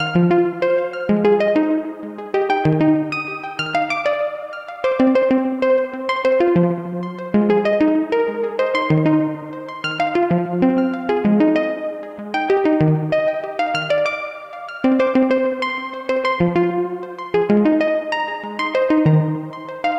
Electronic Dance Loop Arp Created with Novation Peak
June 2020